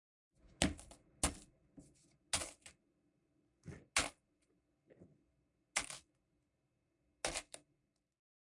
Cracker Foley 5 Close
Graham cracker foley recorded with a pair of mics in XY stereo arrangement (close), and small diaphragm condenser mic (far) running parallel. Processed in REAPER with ambient noise reduction, compression, and EQ. Each file mixed according to the title ("far" or "close" dominant).
cookie, cookies, cracker, crackers, crumble, crumbles, crumbling, design, dry-bread, dust, dusting, effects, foley, food, foods, footstep, gamesound, gingerbread, graham, pop, sfx, sound, sound-design, sounddesign, step, steps